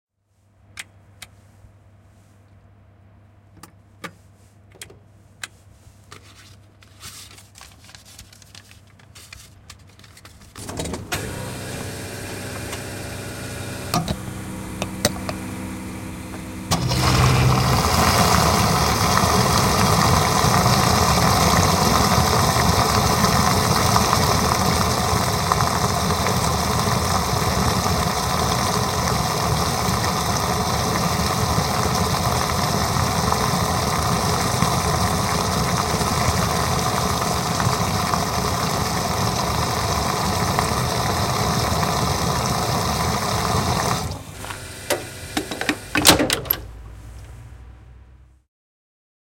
Tankkaus, bensamittari / Fuel dispenser, refilling the tank at a petrol station, cash machine, a close sound
Bensa-automaatti huoltoasemalla, seteliautomaatti. Tankin täyttö, suutin telineeseen. Lähiääni.
Paikka/Place: Suomi / Finland / Nummela
Aika/Date: 10.02 1993
Yleisradio, Refuel, Autoilu, Tankki, Auto, Finnish-Broadcasting-Company, Petrol-tank, Gas, Refuelling, Polttoaine, Bensa, Finland, Tehosteet, Yle, Tankkaus, Refill, Bensiini, Field-Recording, Petrol, Gas-station, Suomi, Huoltoasema, Soundfx, Fuel, Car